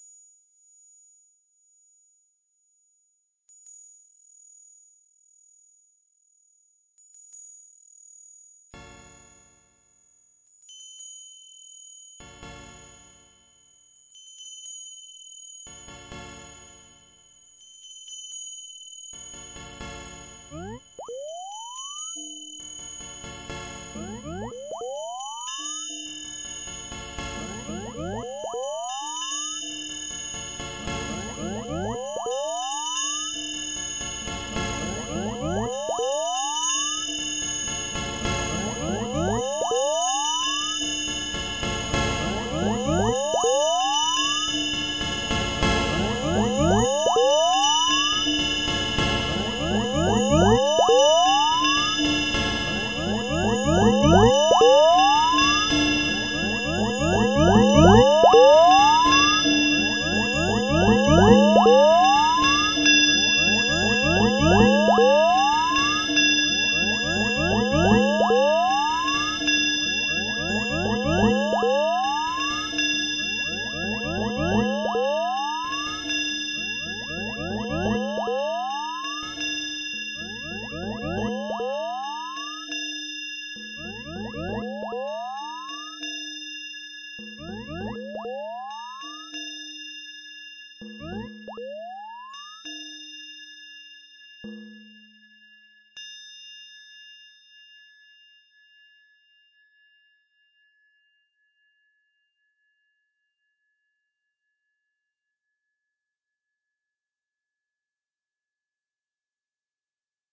Fantasy Machine
A bit of Willy Wonka with a dash of 60's Doctor Who.
Starts off quiet then gets pretty loud.
device, fantasy, scifi, weird